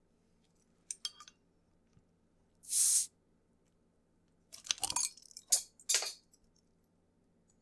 Opening bottle with falling cap
Sound of a capped bottle being opened, cap falling.
bottle, bottle-cap, open, open-bottle, open-drink